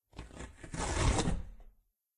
Slowly Ripping Cardboard
One of the ripping sounds I recorded while disassembling some cardboard boxes. Very raw, just cleaned up in audacity.
box, cardboard, paper, rip, ripping, tear, tearing